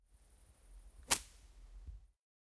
A recorded of a bush in the forest being slashed with a stick. Poor bush.